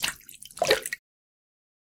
Two Slaps 001
aqua, bloop, blop, Game, Lake, pour, pouring, Run, Running, Sea, Slap, Splash, Water, Wet